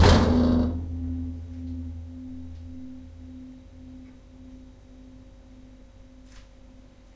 Wok lid on hob 01
Wok lid on top of hob.
Hobs have a metal structure on top of it where the pots and pans stand (don't know the name of it)
Hitting the hob lid made it vibrate in conjunction with this metallic structure producing strange counds.
Mild hit on the lid edge with a plastic utensil.
Recorded with Zoom H1 built in mic.
resonating, wok-lid